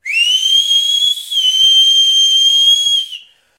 Long Whistle #1
A long male whistle.
(Whistling of russian tale's character - Solovei The Brigand :))
environmental-sounds-research, male, people, whistle, whistling